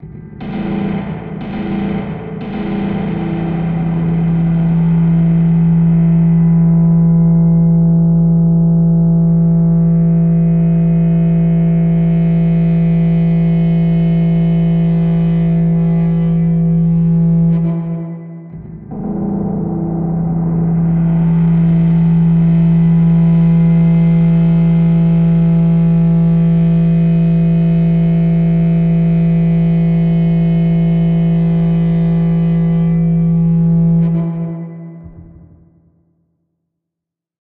A fairly gentle bit of feedback created by hitting the body of my guitar with my knuckles and holding the chord.
electronic, feedback, guitar, music, noise, processed